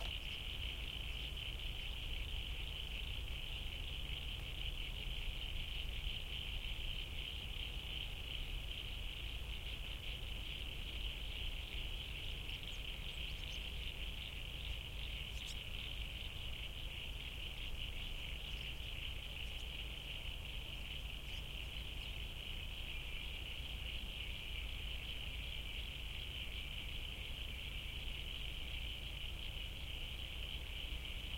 LBS Atmos EXT Day Rural NSW001
country, marantz